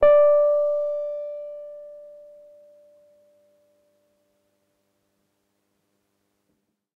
My Wurlitzer 200a Sampled thru a Lundal Transformer and a real Tube Preamp. The Piano is in good condition and not bad tuned (You still can retune 3 or 4 Samples a little bit).I Sampled the Piano so that use it live on my Korg Microsample (so I also made a "msmpl_bank")